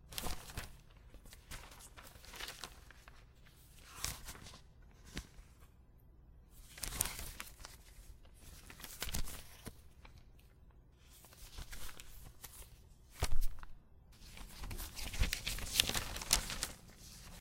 Paper Fluttering
Irregular and erratic fluttering, shaking, and flipping of paper pages.